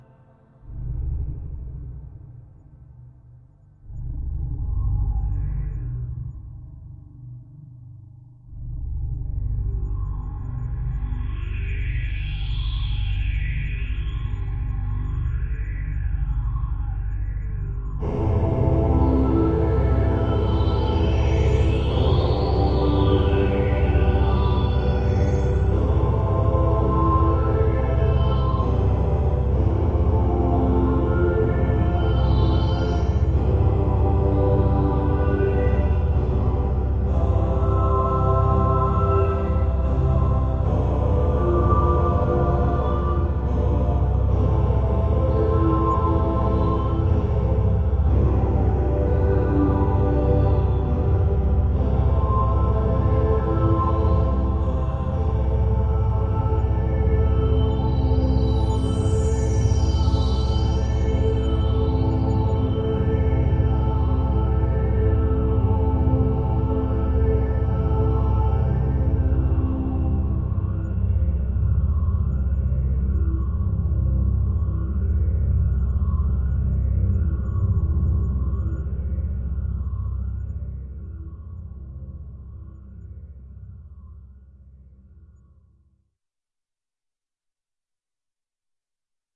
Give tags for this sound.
ambient; atmos; atmosphere; background; phantom

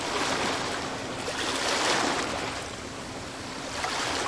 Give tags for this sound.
assignment; wiener

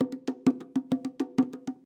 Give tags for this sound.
bongo; drum; loop; percussion

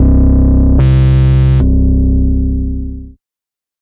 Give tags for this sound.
110; 808; 909; acid; bass; beat; bounce; bpm; club; dance; dub-step; effect; electro; electronic; glitch; glitch-hop; hardcore; house; noise; porn-core; processed; rave; resonance; sound; sub; synth; synthesizer; techno; trance

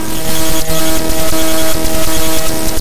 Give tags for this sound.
buzz; buzzing; electronic

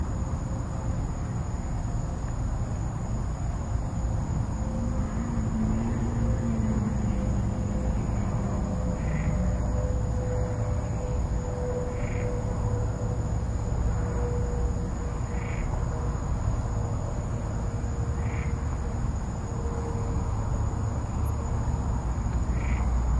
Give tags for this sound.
field-recording; frogs; insects

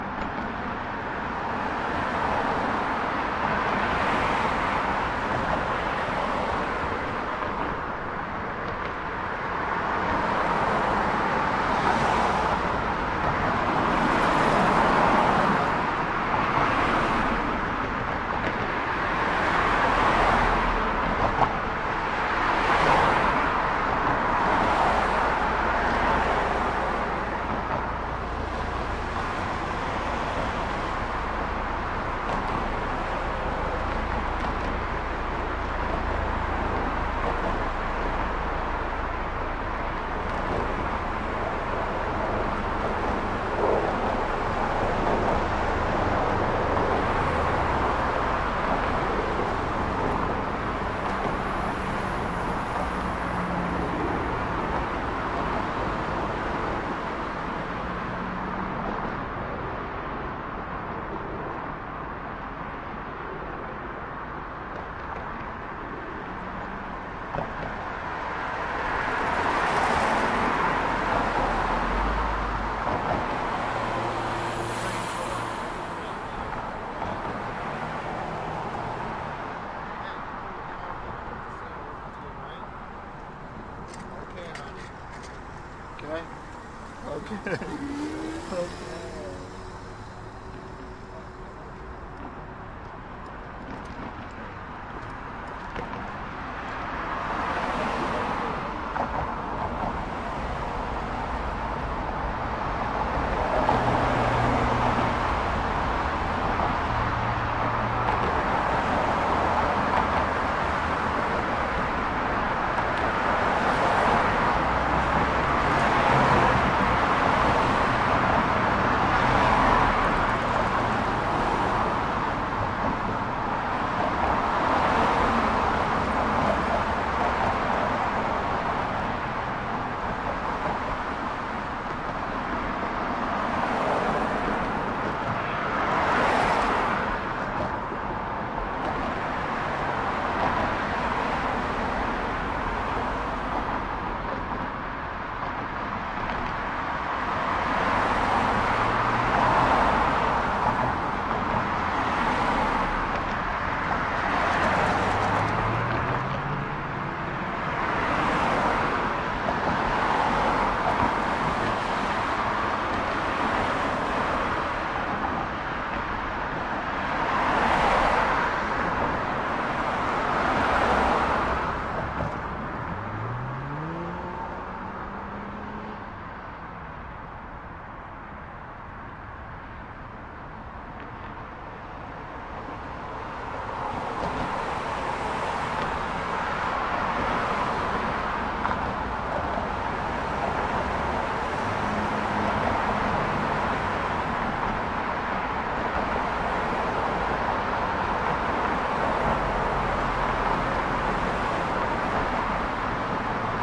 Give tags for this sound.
Frank; Traffic

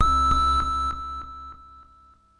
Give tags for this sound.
waldorf; 100bpm; multi-sample

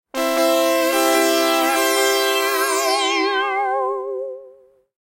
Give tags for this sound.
1; fanafare; polysix

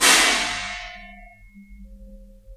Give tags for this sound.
hit; hospital

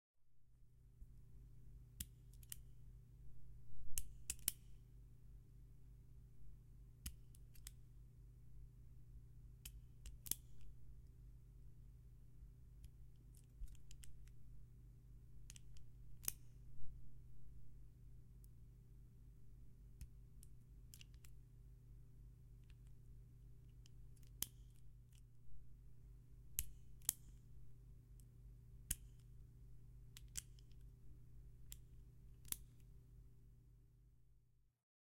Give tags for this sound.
bullet; bullets; clip; fiddle; gun; handgun; load; loaded; metal; metallic; reload

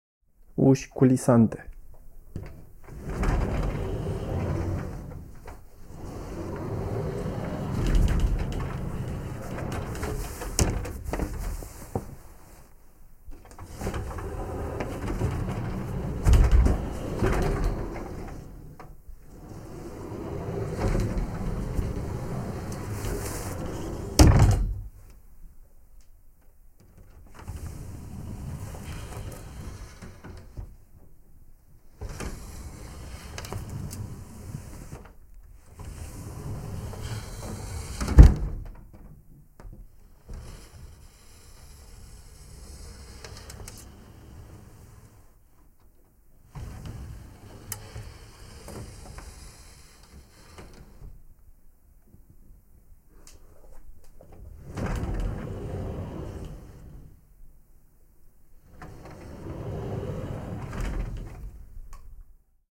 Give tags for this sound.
door; wardrobe

